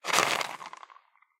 Foley for a bug scurrying along rocks in a glass tank. Quick, high pitched scurry in the pebbles. Made by shaking a plastic jar of almonds.